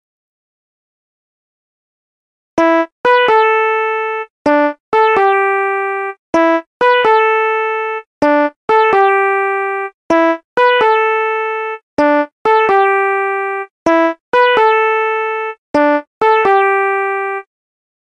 Audio Remix 4
A piece of music I did for one of my remixes but I didn't get to use it. Well, anyway.
Created in 3ML Piano Editor.